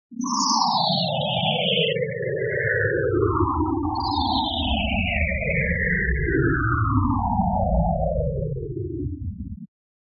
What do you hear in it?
Coagula Science! 8 - Foreground Shutdown
Synthetic sound.
Made in Coagula.